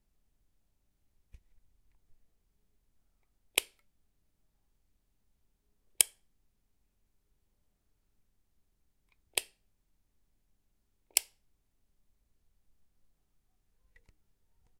Recording of plastic light switch being flicked on and off.
push, switch, electrical, lamp, flip, switches, switching, plastic, light, off, electricity, light-switch, click, button, toggle, flick